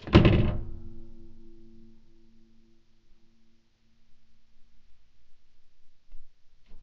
its key keyboard synthesizer spring slowed
keyboard, spring, key, slowed